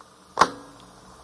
Unintentional noise collected editing audiobooks home-recorded by voluntary readers on tape. digitized at 22khz.